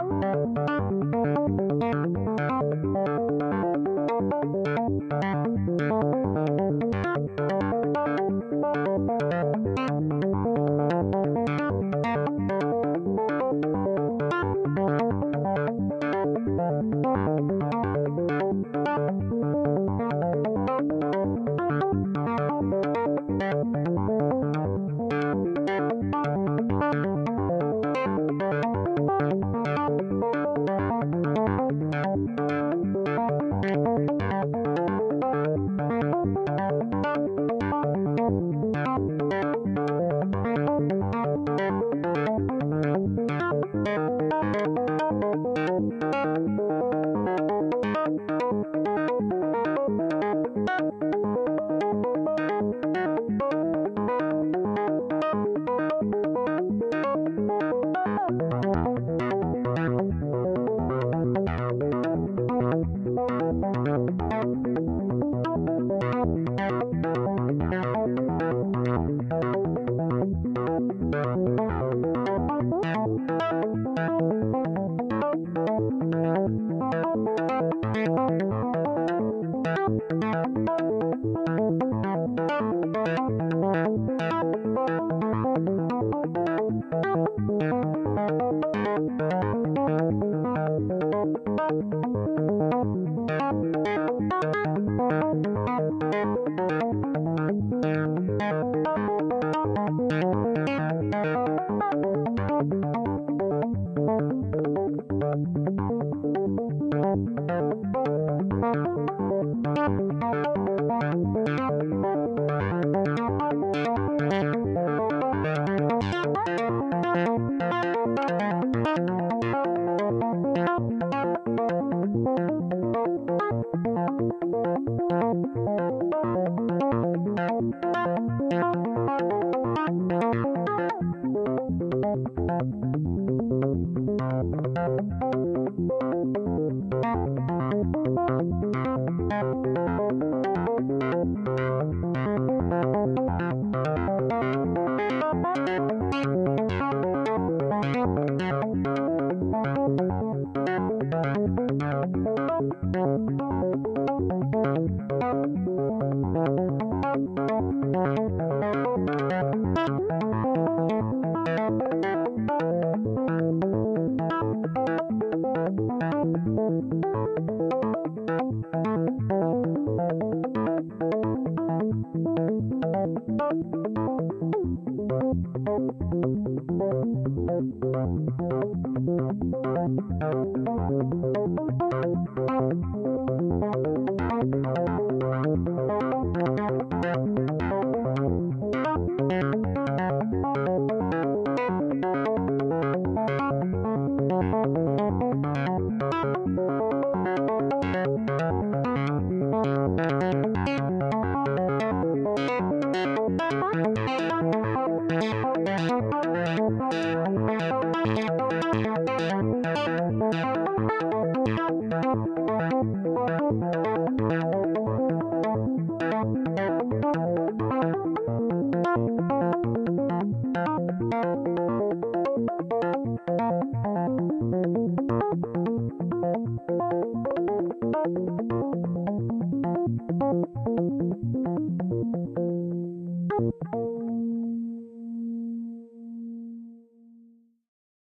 Semi-generative analog synth sequence (with delay) in random keys.
One of a set (a - h)
Matriarch self-patched & sequenced by Noodlebox
minimal post-processing in Live